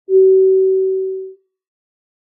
Airplane cabin attention tone
Please fasten your safety belts. This FM synthesis (Sound Forge 7) is similar to the tone you might hear in the cabin of a commercial airliner. It was generated with two parallel sine waves at 330Hz. It was further processed with a moderate re verb.